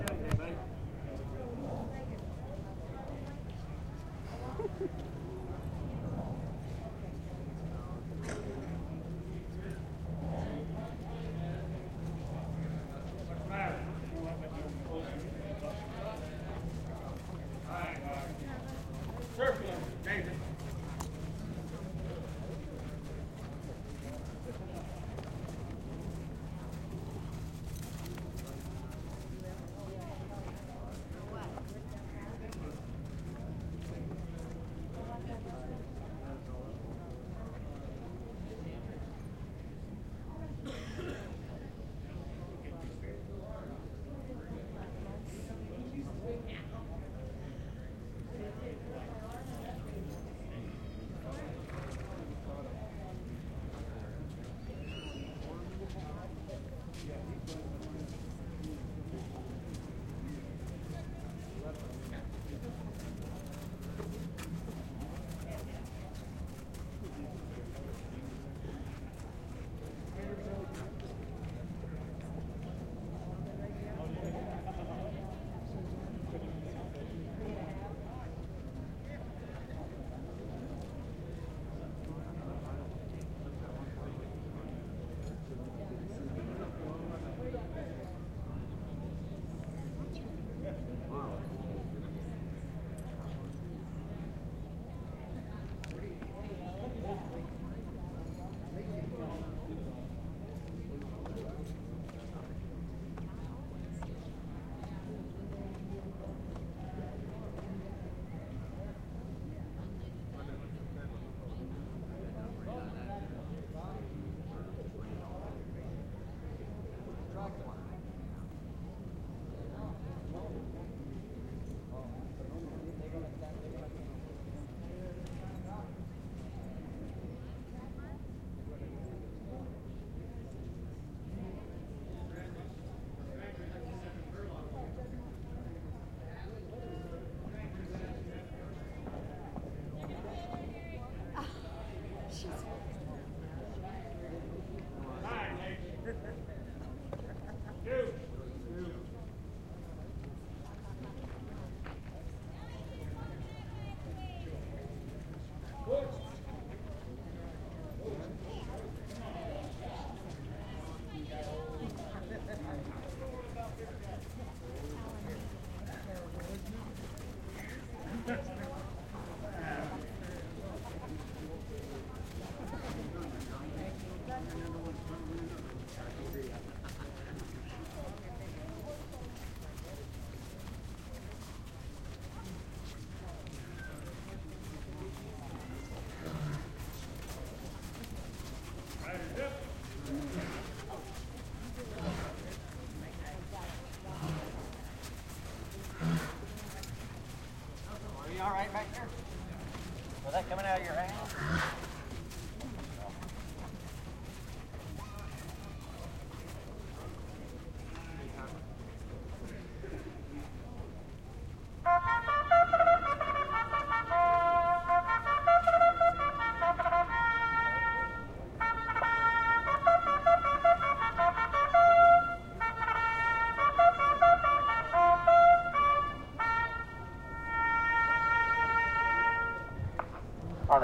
paddock sounds
This is the sound of people discussing the horses warming up in the paddock at Arapahoe Park in Colorado. It is fairly quiet but you can hear "rider's up" near the end.
horse-racing, horse-race, crowd, race, racing, track, horse